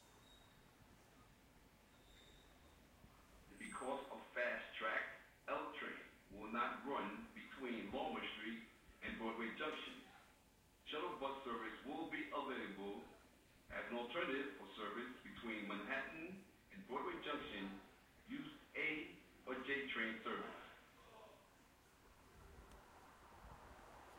Subway, L train service announcement
Service announcement on the platform in the NYC subway regarding L train service in Brooklyn